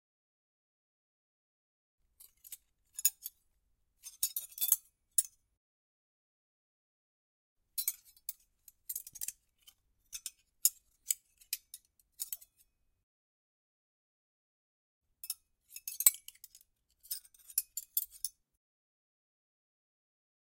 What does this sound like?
cutlery clinking
CZ, Czech